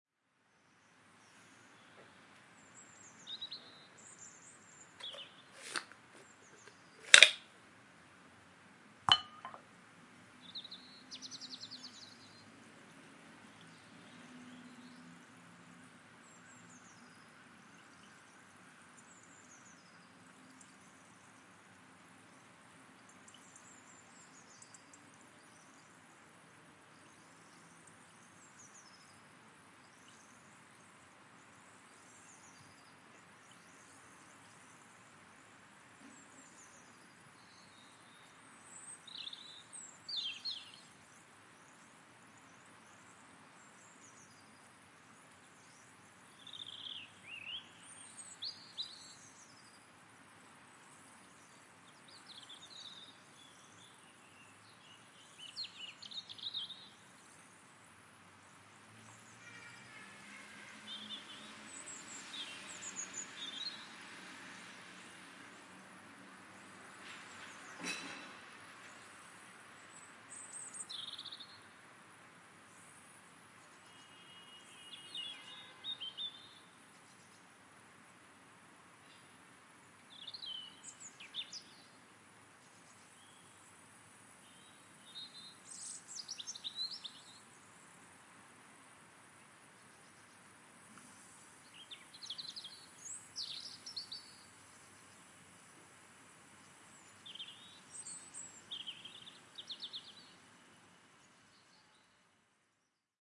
Birds & Berocca
A recording of the birds from my window in Bristol, this also includes the effervescent fizzing of a Berocca tablet in a pint of water.
Captured with a Shure MV88
ambient, electronic